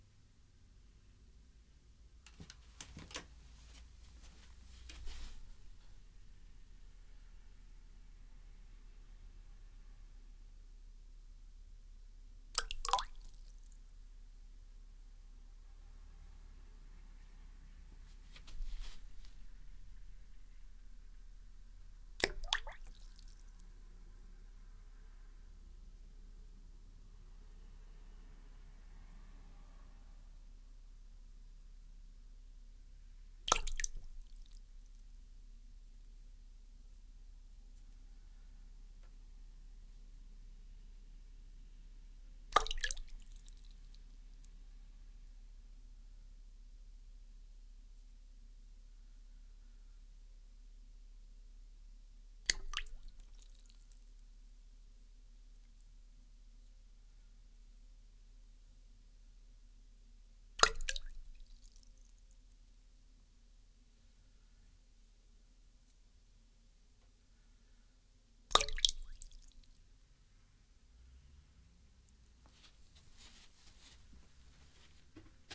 ring drop water
wedding ring drop at water. recorded Audiotechnica 4040 / ROLAND OCTA CAPTURE
drop, ring, water